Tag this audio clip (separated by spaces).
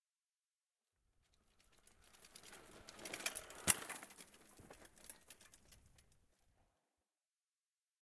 rider click chain approach whirr street freewheel ride terrestrial park wheel bicycle bike jump pedaling downhill